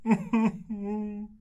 33-voz angustia2
sad, voice